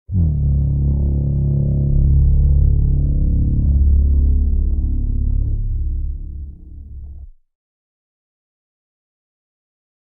Cherno Alpha Horn
I downloaded a simple boat horn that user felix.blume had recorded in Istanbul. I was looking to recreate the Cherno Alpha's horn from Pacific rim, since I had just watched the movie and the horns were mainly the reason I had so much interest in it.
Mind you, I work back and forth from Audacity and FL Studio while creating my sounds, but I mainly work in FL studio.
After I had cropped the boat horn, I added a Fruity Bass Boost filter, both knobs at 100%, I added a Fruity Fast LP filter, created an automation clip for the cutoff which placed itself in the Playlist along with the cropped sound, and I adjusted the wave-curve for it to make the sound vibrate at a reasonable frequency so that the horn wouldn't vibrate faster than its base pitch.
I then exported that, re-imported it and added another Fruity Fast LP filter to make the vibrating sound a little more soft, and assigned the re-imported audio file so that it could be modified by the pitch modifier in fl studio.
Cherno-Alpha, Titan, Pacific-Rim, Boat-Horn, blume, Jaeger, Kaiju, Horn, cherno, felix